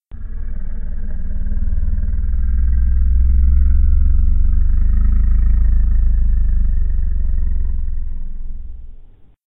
Monster Low Rumble 1
A low monster rumble.
creature, fantasy, low, monster, rumble